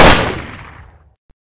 Layered Gunshot 3
One of 10 layered gunshots in this pack.
shoot, sound, gun, shot, layered, epic, awesome, gunshot